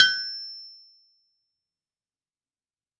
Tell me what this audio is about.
Lokomo A 100 kg anvil tapped on the horn once with a hammer.